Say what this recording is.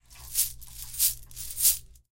Monedas saco
coin bag in movement
bag, coin-bag